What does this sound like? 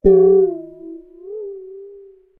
A stereo recording of a stainless steel bowl that has some water inside it struck by hand. Rode Nt 4 > FEL battery pre amp > Zoom H2 line in.